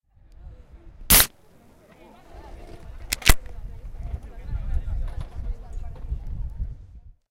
This sound was recorded in the UPF's plaça gutenberg. It was recorded using a Zoom H2 portable recorder, placing the recorder next to someone who was opening a beer can.
There is a little bit of clipping at the beginning when the can is opened, which makes it hard to make out something of the sound, but when it is fully opened one can get a better idea of what the sound is.